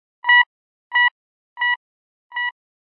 bajar subir volumen sintetico
Volume sound
sonido de volumen